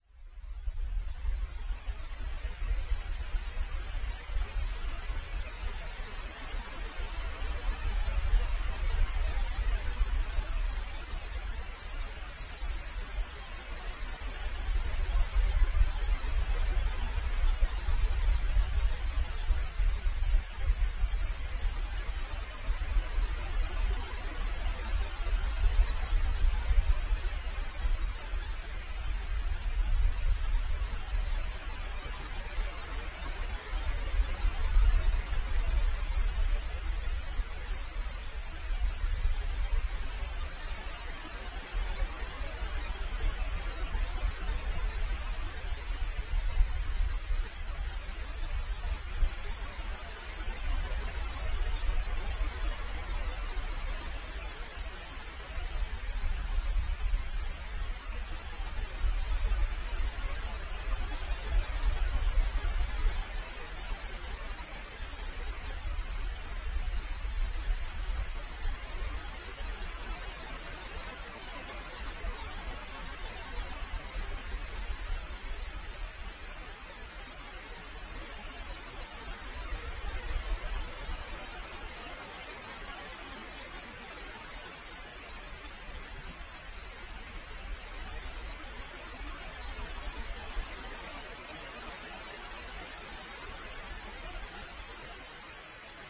Started out as a sample of an aluminum girder being struck, then the tail of that was granulated.